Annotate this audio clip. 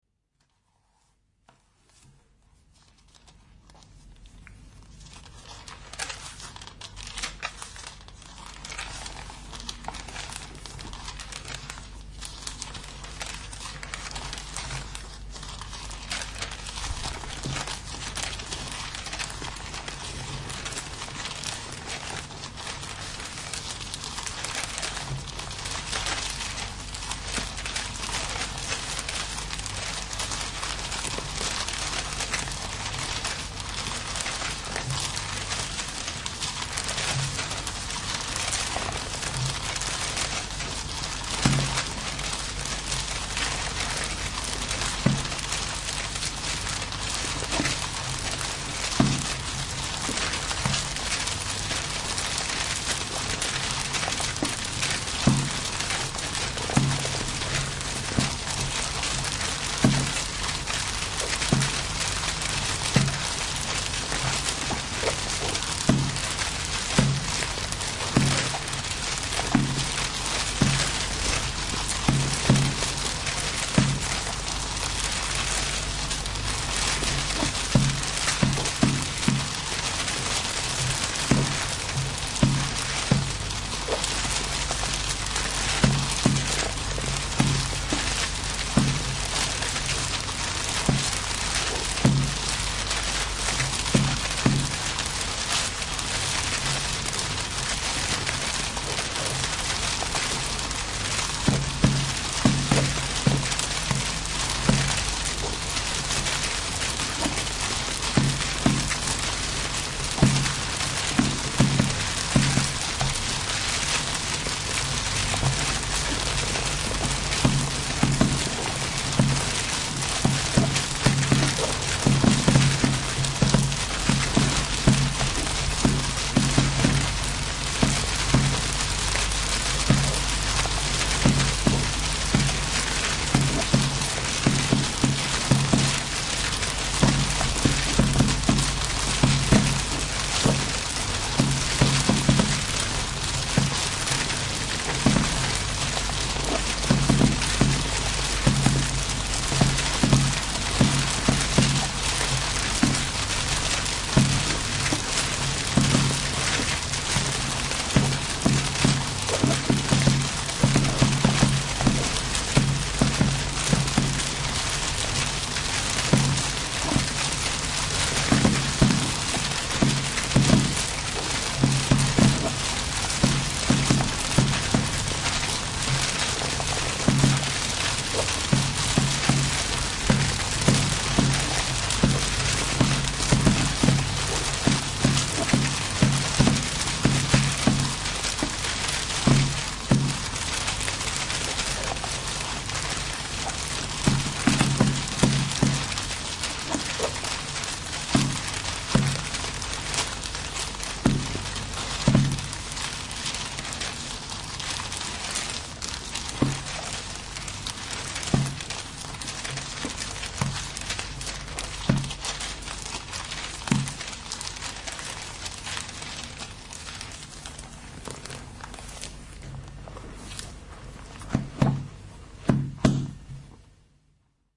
Sound art piece made upon Charel Sytze recordings under the sample pack "The Old Testament". Putting all 39 sounds together like a juggler spins the dishes up on the sticks, you can hear something quite different, a mass of undefined sound only broken by the pops of the closing books, that remind me the rhythm of cooking popcorns.
book, paper, sheets, sound-art